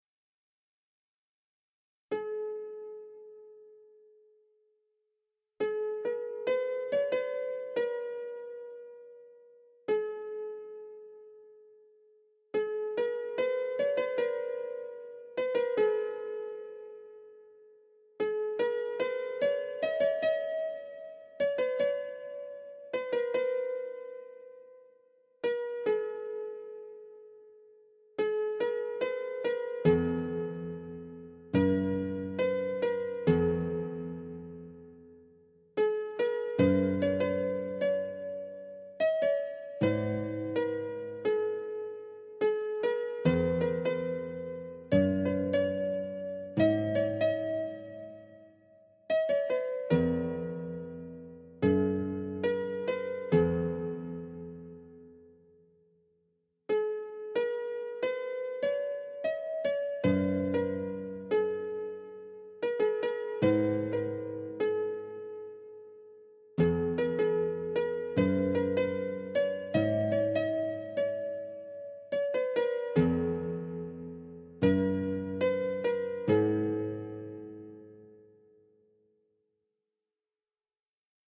A bit of medieval various music that can be used for ambience in games or any other project.
Created by using a synthesizer and recorded with a Zoom H5. Edited with audacity.